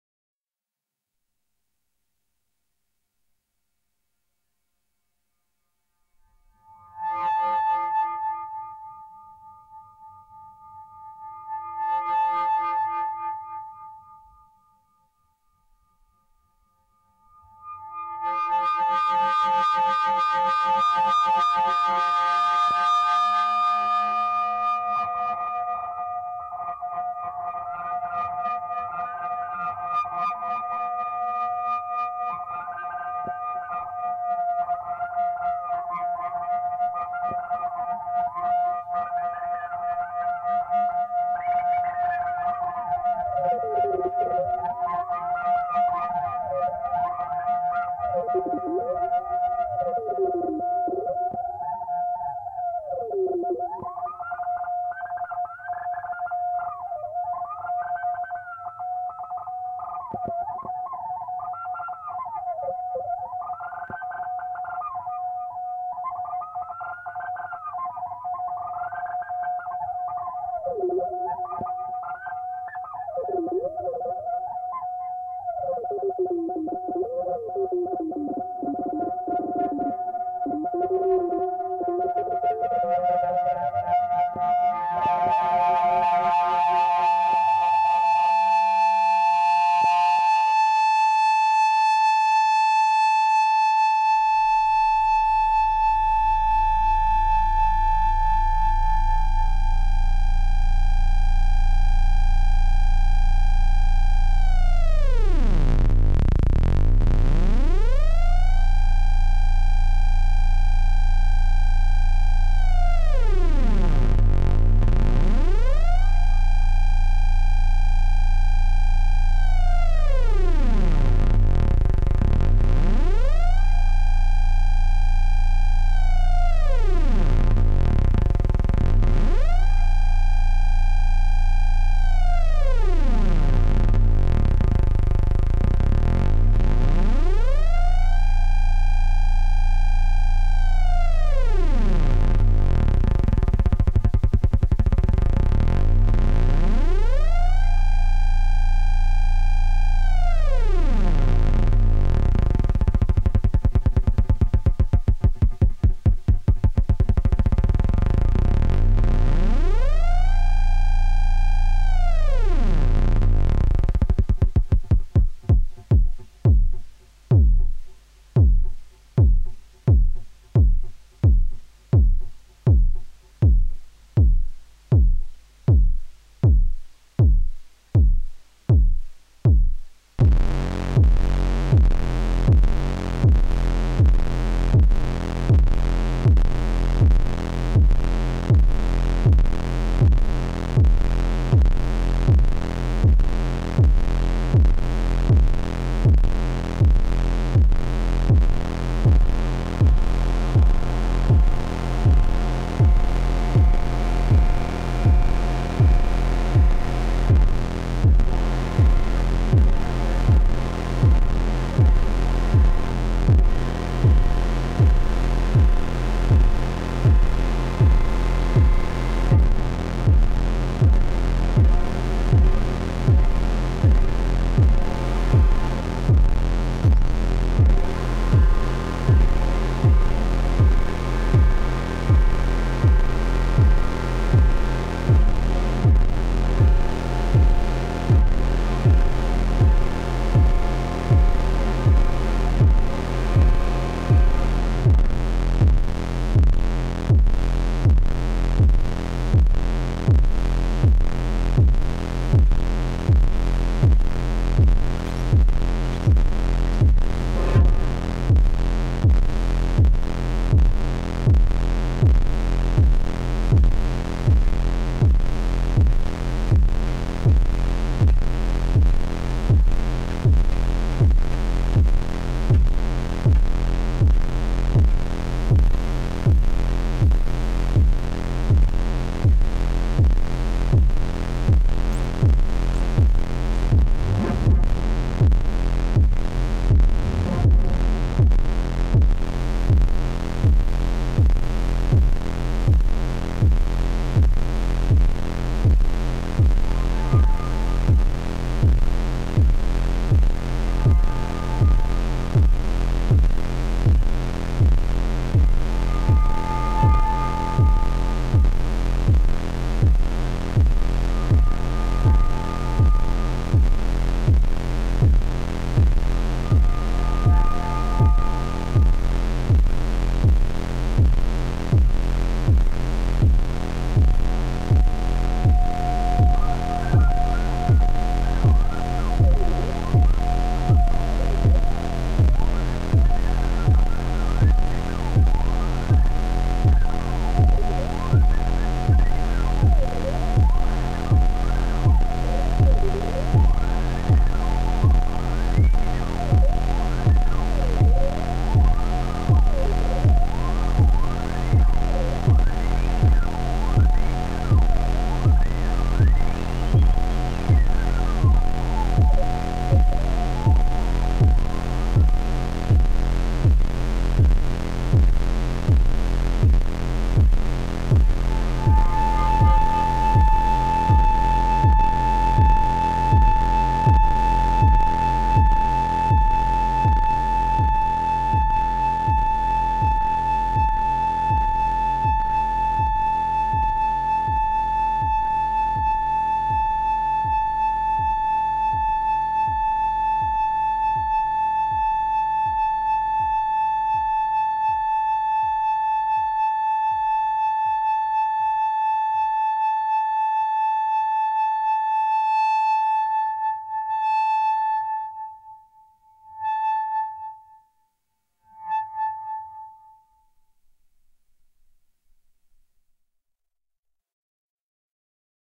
This sound was created using three Korg Monotrons (Original, Duo and Delay) with the help of a Behringer V-amp2 for FX and feedbacks. All the sounds were manipulated in real-time, no post-processing was done to the track. Ideal for sampling and create new SFX or for ambiances. The title correspond to the date when the experiment was done.